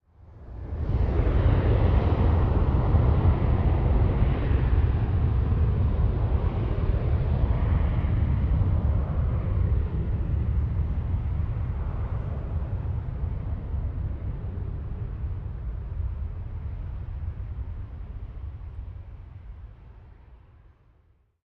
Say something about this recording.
Takeoff 6 (Distant)
A commercial jet plane taking off (all of these takeoffs were recorded at a distance, so they sound far away. I was at the landing end of the runway).
Aircraft, Distant, Exterior, Field-Recording, Flight, Jet, LAX, Plane, Takeoff